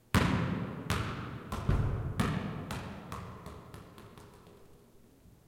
Basketball Hit Wall
basket, sports, basket-ball, ball, field-recording, hits